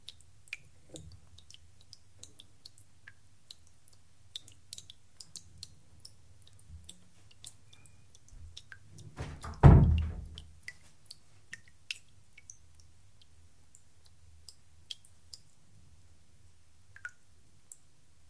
gotes mig
sound of water dripping from 20 cm. high